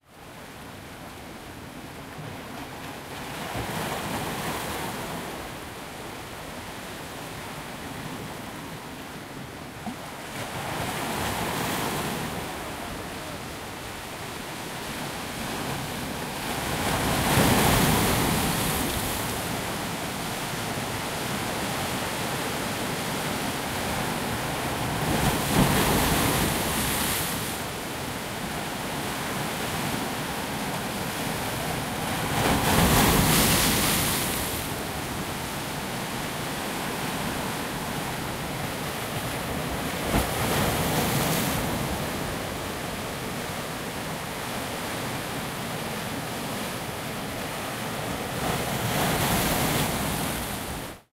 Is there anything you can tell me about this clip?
Taken with Zoom H2N, the beaches of Cyprus